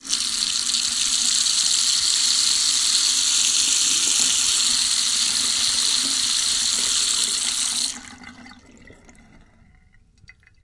floating water fast
Water floats in a basin
bathroom, floating, water